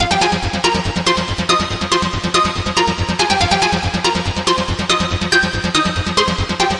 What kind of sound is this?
Trance lead made in a great 15 seconds. Woohoo.